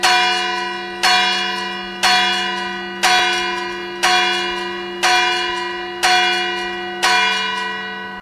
bells.ringing church close
church bell, close, and weak noise of the ringing mechanism. Recorded with an Audio-Technica ATR55 telemike/ campana de iglesia, cercana, y rebote del mecanismo de golpeo de la campana. Grabado con un AudioTechnica ATR55
bells, city, field-recording, sevilla, south-spain, street-noise